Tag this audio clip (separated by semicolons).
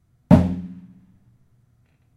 kit; tom; drum